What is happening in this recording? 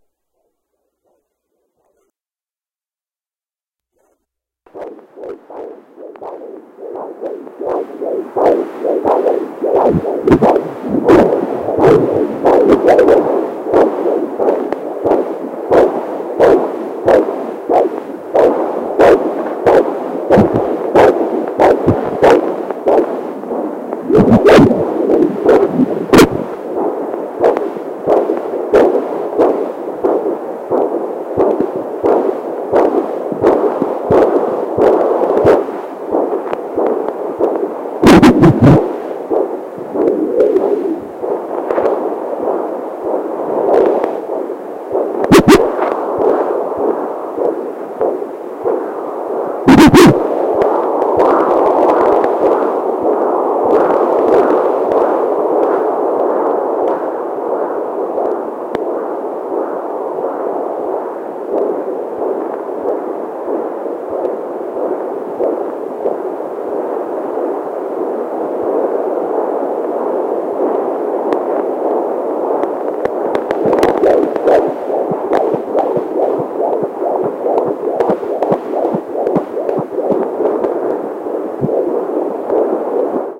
I had a request to upload the entire recording that also includes my wife's heartbeat mixed with my child's. Here it is!